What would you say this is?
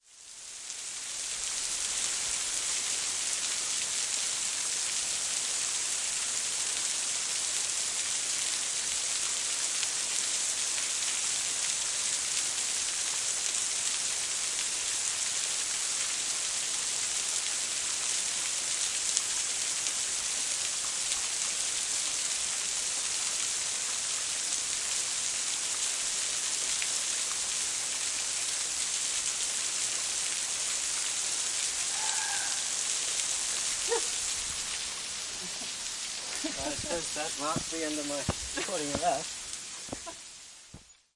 Close Waterfall 7
Field recording of a waterfall recorded from close by, with a funny bit left in near the end.
Recorded in Springbrook National Park, Queensland using the Zoom H6 Mid-side module.
flow, waterfall, river, stream, field-recording, creak, forest, water, nature